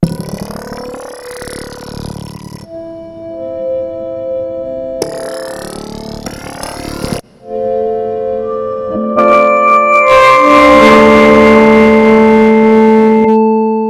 Micron Sounds Pack
Acid Like Bass
Random Synthy Sounds . .and Chords
and Some Rhythms made on the Micron.
I'm Sorry. theres no better describtion. Im tired